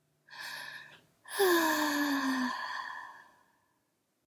Long Female Sigh
A long sigh (female voice). I did this one just for fun, for anyone's use.